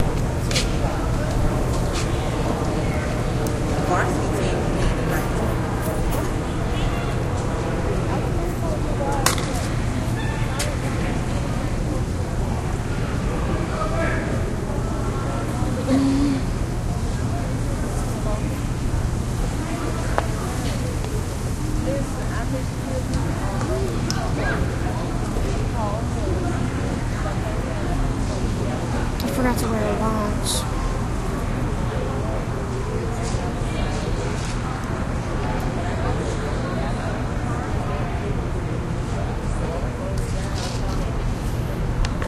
raw recital quiet
Raw unedited sounds of the crowd in a auditorium during a Christmas recital recorded with DS-40. You can edit them and clean them up as needed.
crowd, intermission, audience